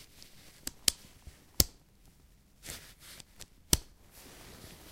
Snap-fasteners05
Here I tried to collect all the snap fasteners that I found at home. Most of them on jackets, one handbag with jangling balls and some snow pants.
snap-fasteners, click, clothing-and-accessories, botton